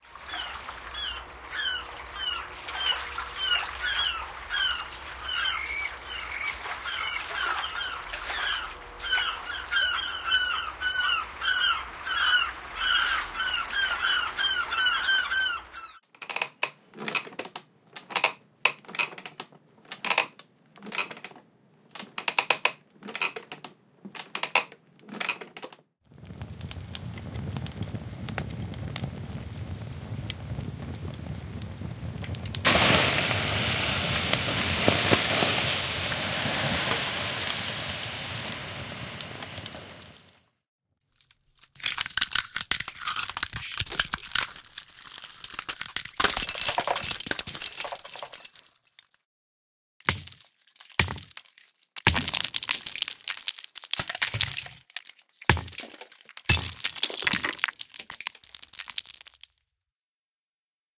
mgreel - found sounds seagulls wooden chair water and fire ice tray chipping ice mgreel
A collection of found sounds spliced and ready for the Morphagene module.
1. Seagulls
2. Squeaky Rocking Chair
3.. Putting out a fire with water
4. Twisting a plastic tray full of frozen ice
5. Chipping an Ice block with a mallet and icepick